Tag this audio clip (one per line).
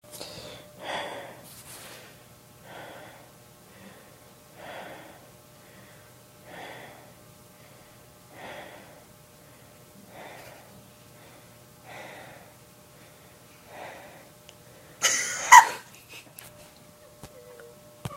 man
male